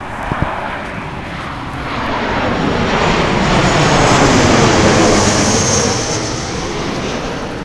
An airplane landing at Delta of Llobregat. Recorded with a Zoom H1 recorder.